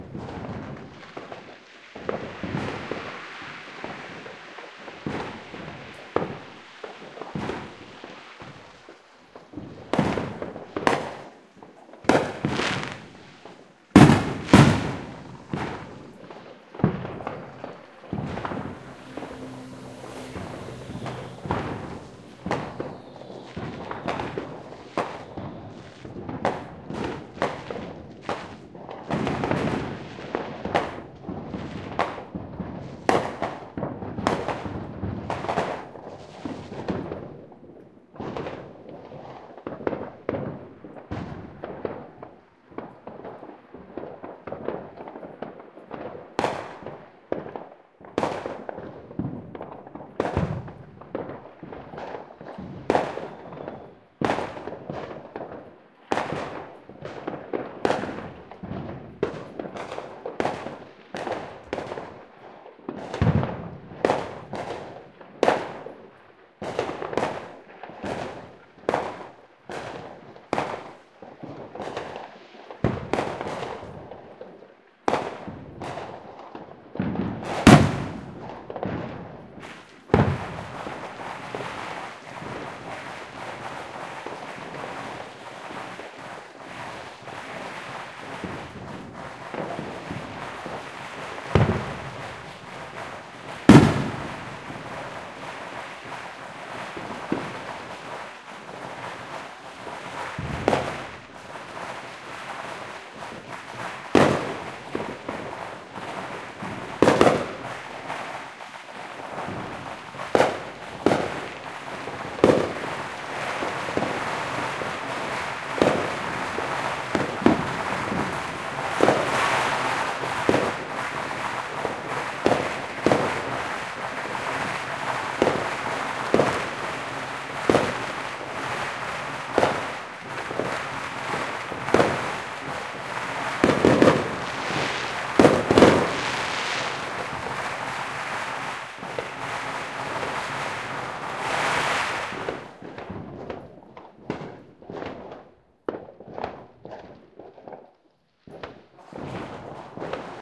Maui NYE Midnight
Hawaiians go absolutely nuts on New Years Eve. This segment shows around midnight. Recorded in my back yard in a "quiet" subdivision in Kihei, Maui. You'll find several files in this series. Hope you find them useful - or at least entertaining! Enjoy!
fireworks, eve, years, maui